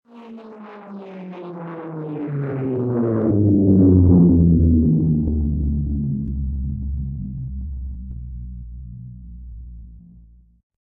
Plane flyby
Fully synthetic plane sound, has been made from a single sinusoidal tone.
Doppler, effect, flanger, sci-fi, Synthetic, vibrato